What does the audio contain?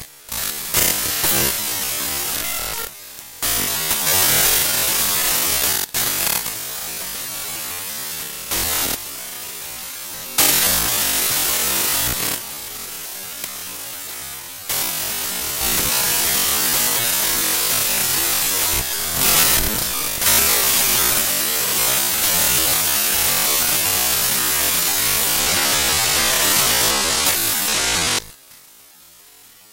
Quicktime Alternative recharged
After an idea by Experimental Illness (you rule your backyard when it glams to kitsch): I loaded quicktimealt.exe in Wavelab, mangled it a bit more using dfx VST's Scrubby and Skidder, manipulated the file partially at clusterlevel and recovered it, stretched it from 80 to 10 bpm twice before offering it to you all in tasty mono format. Delicious.
crush, mangled, brutal, glitch, anger